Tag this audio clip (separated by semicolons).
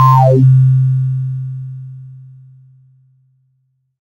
acid; bass; lead; multisample; ppg